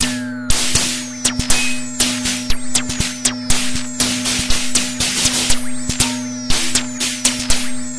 Aerobic Loop -36
A four bar four on the floor electronic drumloop at 120 BPM created with the Aerobic ensemble within Reaktor 5 from Native Instruments. Very weird, noisy, experimental electro loop. Normalised and mastered using several plugins within Cubase SX.